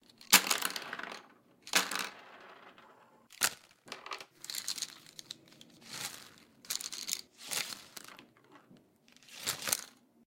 Dumping Out Pencils
A bunch of takes of dumping out 10 pencils onto first a wooden table surface, then on to a piece of paper. Recorded from about 12 inches